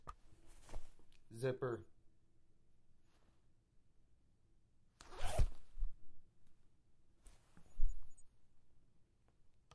a zipper going down and up